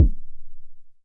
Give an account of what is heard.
Just some hand-made analog modular kick drums